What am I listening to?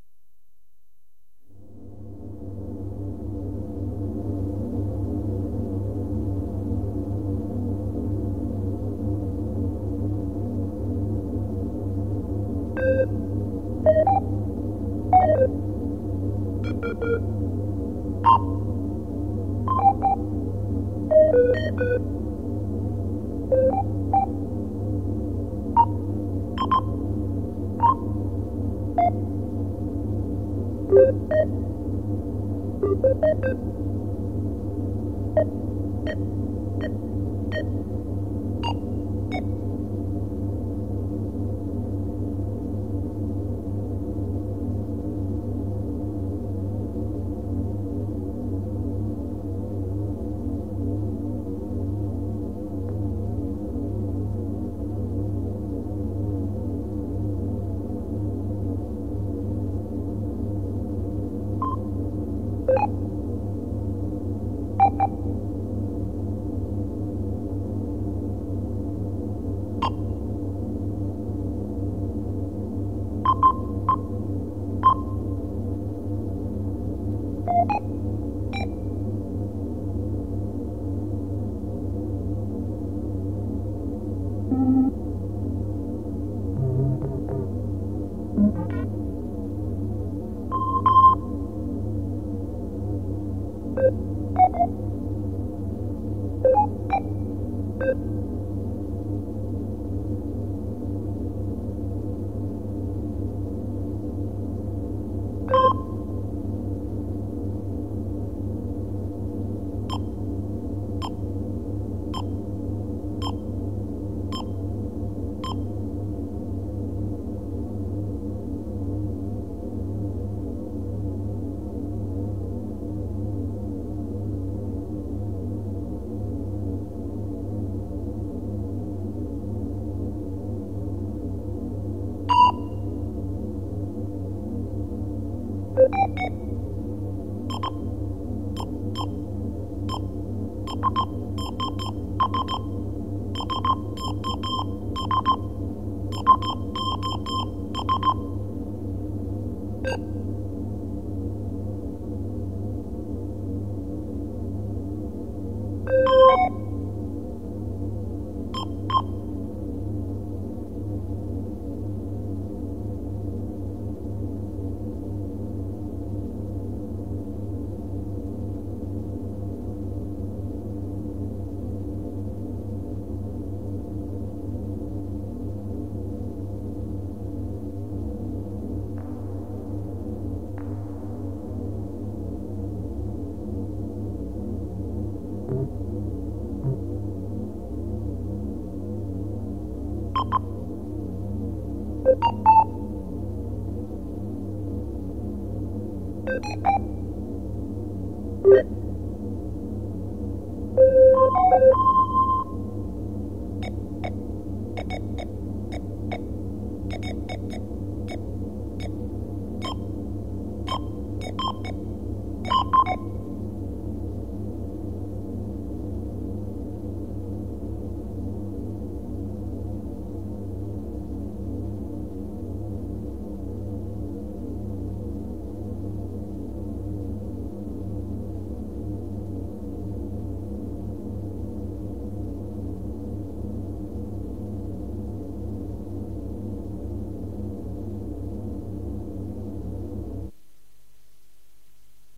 avaruusaluksen-sisatila2-spaceship-interior2
spaceship interior made with nordlead 2 and recorded with vf16. some beeps.